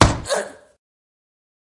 Deep Impact Girl OS
Impact Female Voice